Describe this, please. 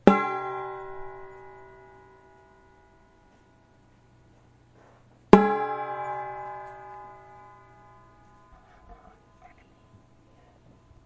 Tascam DR-07 recorder Stereo of metal stock pot lid stuck with wooden cooking spoon
bot, clang, gong, lid